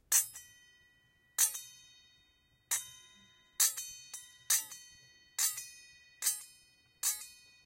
Mysounds LG-FR Imane-diapason
Sounds recording from Rennes
CityRings, Rennes, France